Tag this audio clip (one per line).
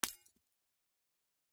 glass
ornament
hammer
smash
bright
shatter